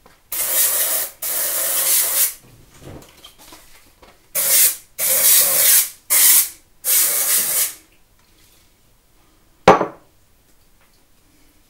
Spray on deodorant recorded with a Samson C15 into Protools.
spray, aerosol, can, deodorant